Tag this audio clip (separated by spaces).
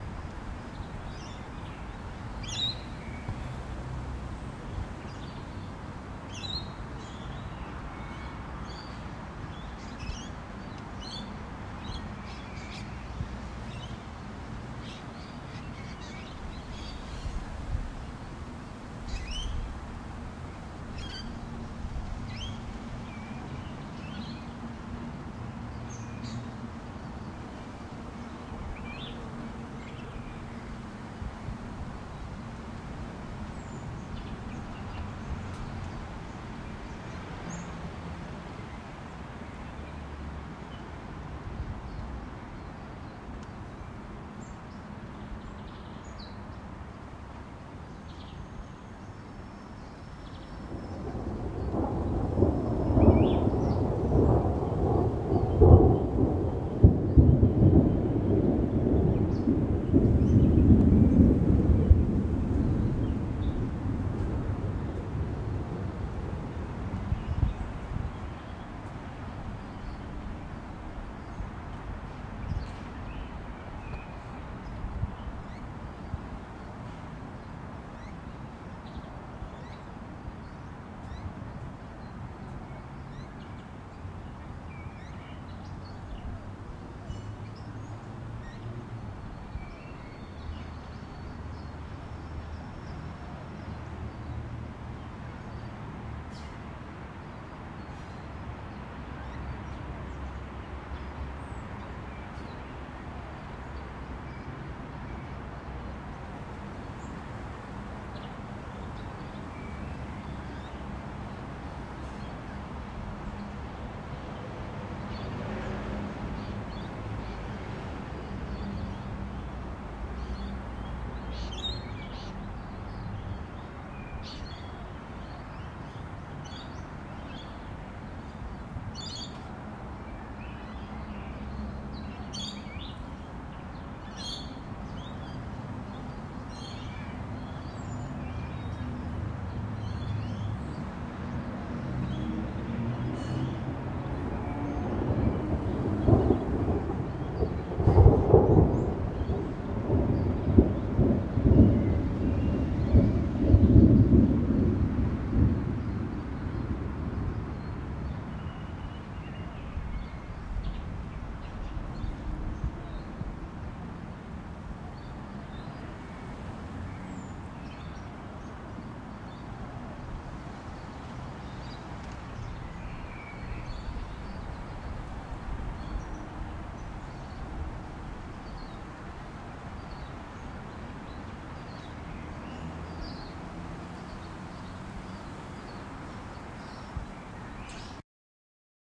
atmos atmosphere birds nature outside